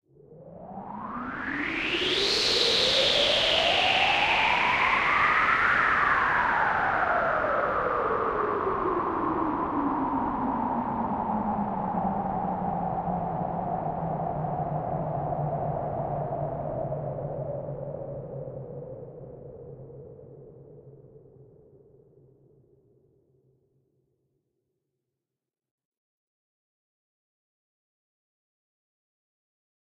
ambient wind
A processed gust of wind
ambient, background, gust, wind